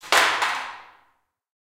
A plastic chair thrown on a stone floor in an empty basement. Recorded in stereo with RODE NT4 + ZOOM H4.